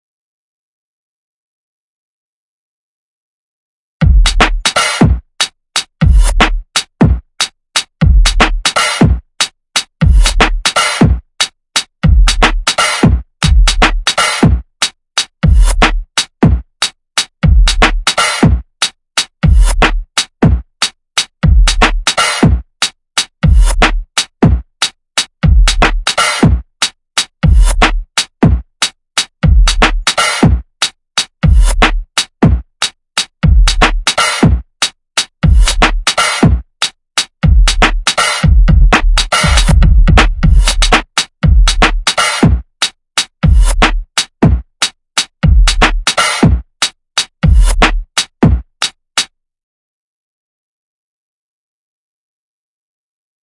gitan2-drums
Tanger 2000 drums track